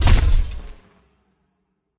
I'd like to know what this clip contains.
Energy/magic shot
Here is a magical/energy shot sounds i made. Enjoy and please drop a link if you use it anywhere, i would love to check it out!
ability, charge, energy, firing, magic, shot, weapon